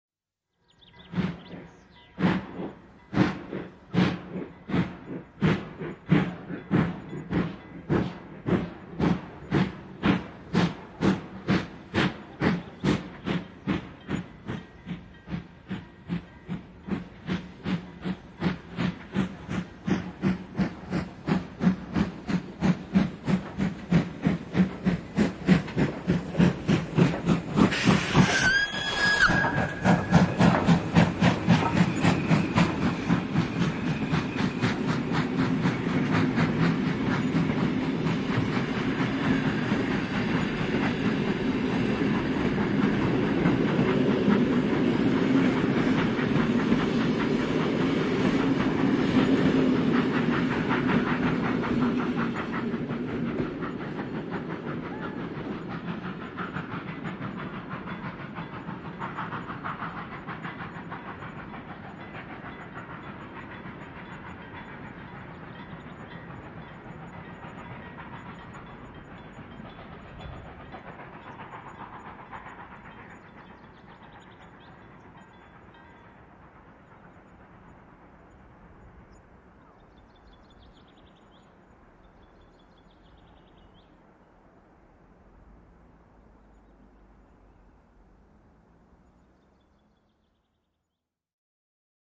Höyryveturi, lähtö, vihellys / Steam train, steam locomotive, pulling away puffing, whistle
Höyryjuna (Hr1 No 1004 "Ukko-Pekka"). Lähtö asemalta etäämpänä puuskuttaen, vihellys kohdalla, etääntyy.
Paikka/Place: Suomi / Finland / Karjaa
Aika/Date: 01.06.1985
Railway, Junat, Field-Recording, Soundfx, Locomotive, Rautatie, Rail-traffic, Steam-train, Juna, Train, Raideliikenne, Veturi, Yle, Tehosteet, Yleisradio